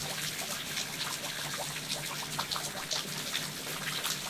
Some rain going through a rain gutter
drain, flow, gutter, gutters, rain, rain-gutters, spout, water